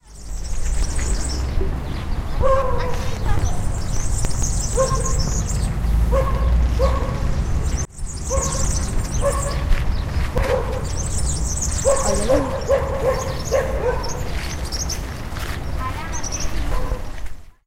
Gafarró Adrián, Lídia i Shelly
En aquest enregistrament s'escolta un gafarró. Que estava pujat a un arbre del parc de la Solidaritat, al Prat de Llobregat.
bird, deltasona, el-prat, field-recording, gafarro, park, serin, tree